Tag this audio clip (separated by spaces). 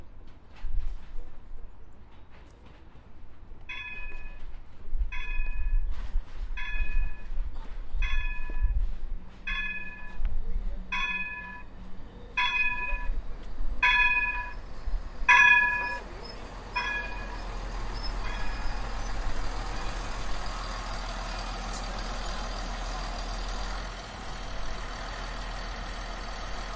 platform railway station train